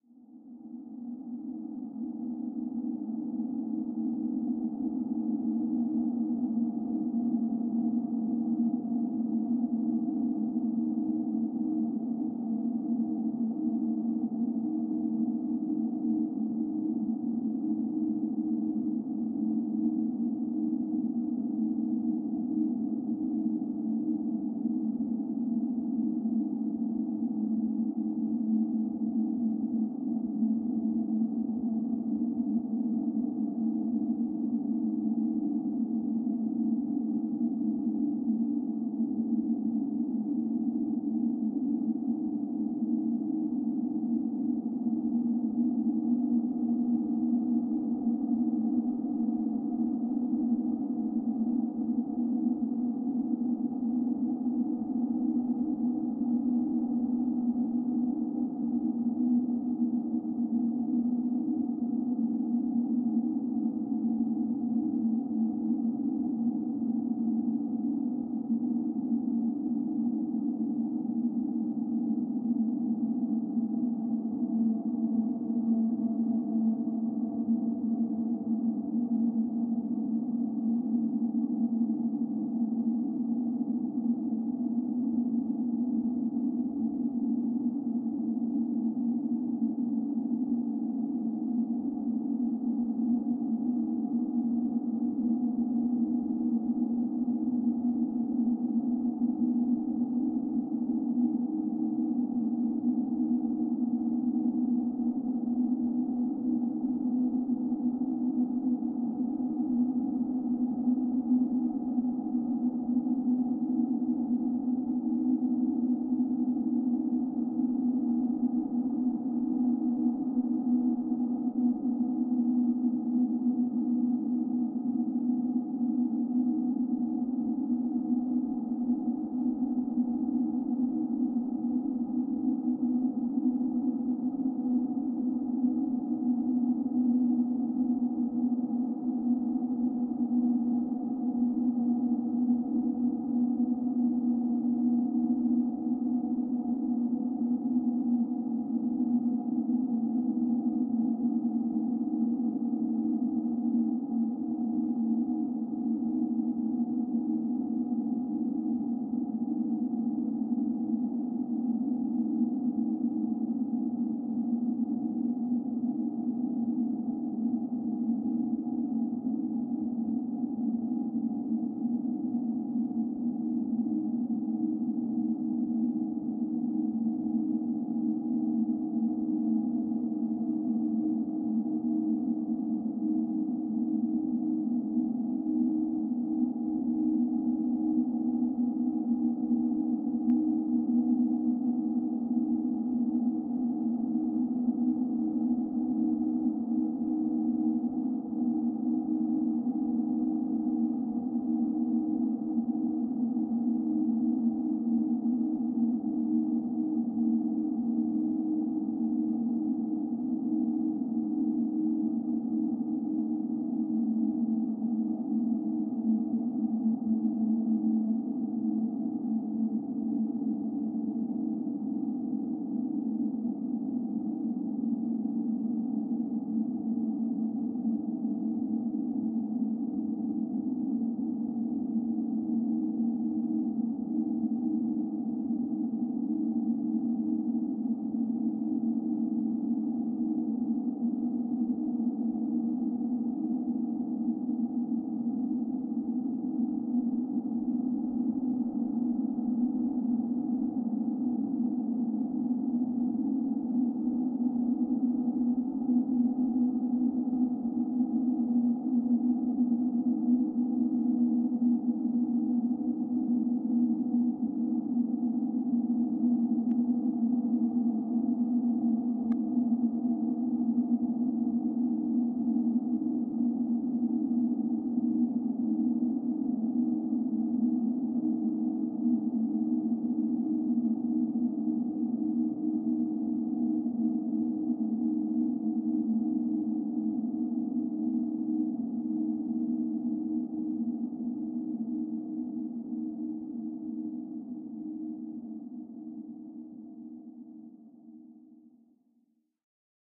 Ambience 06. Part of a collection of synthetic drones and atmospheres.